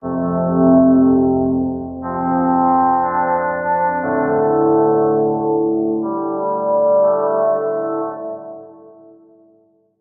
FORF Main Theme Puzon 01
epic, puzon, soundtrack, trailer